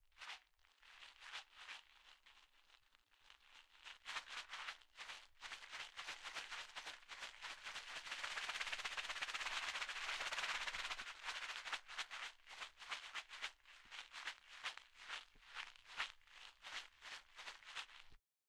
Little Balls
The sound of plastic balls being shaken in a plastic container.
Plastic-Balls, Plastic, BB